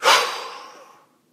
Guy letting out a sharp, nervous breath of air
POOOOOOOOOH. Someone's psyching themselves up to do something they REALLY don't want to do.